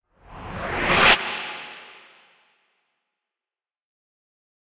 Air Sweeper 1
Simple radio effects created with general sound efx and processing in Ableton Live Lite.
sound-efx, radio-imaging, sweepers